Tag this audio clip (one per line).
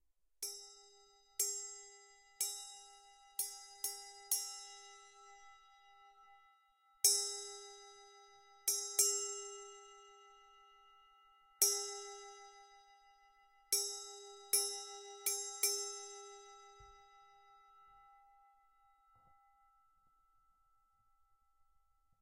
Sound
Nottbowl
Korea